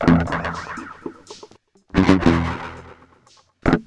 deep echo tube 1
chilled solo guitar from a friend
chill, guitar, solo